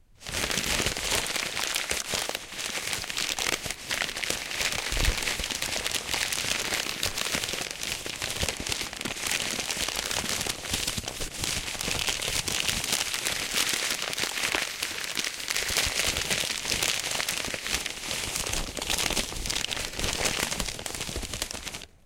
rustle.paper 1
recordings of various rustling sounds with a stereo Audio Technica 853A